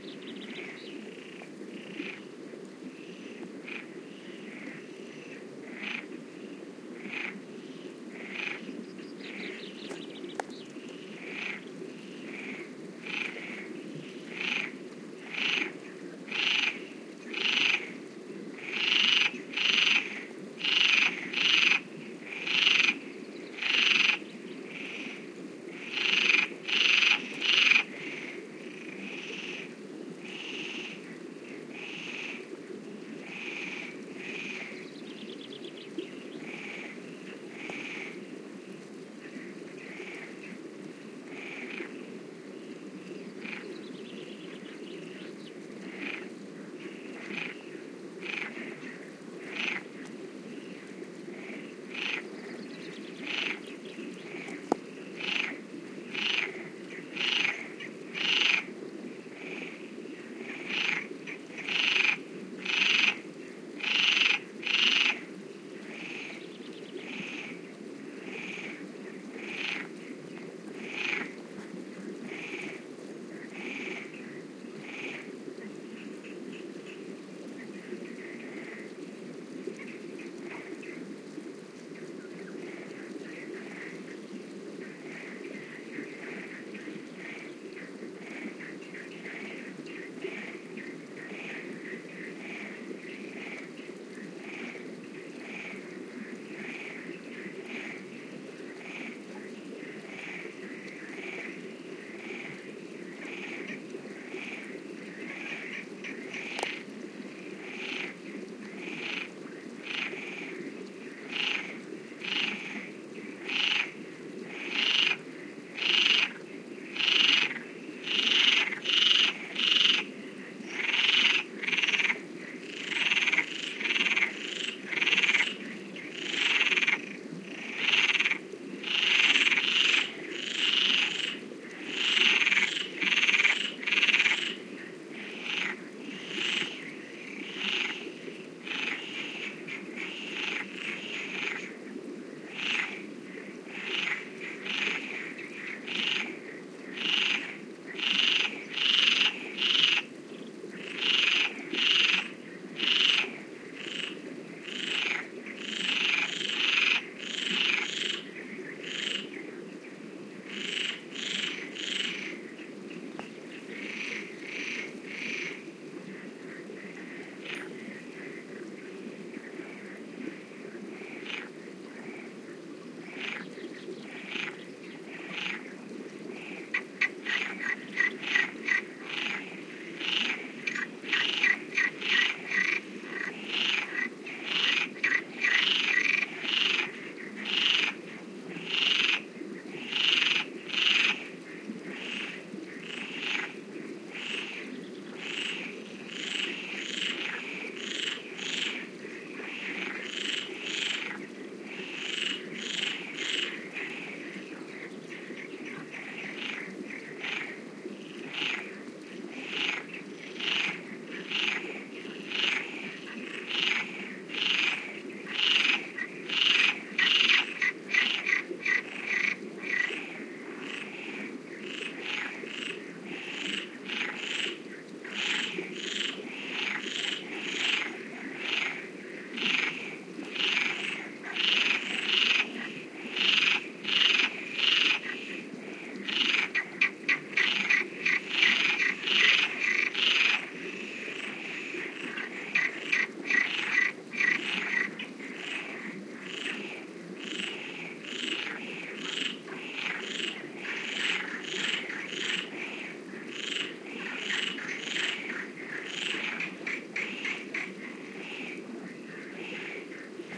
Frogs croacking, some birds in background. PCM-M10 recorder with internal mics. Near San Lorenzo de Calatrava (Ciudad Real, Spain)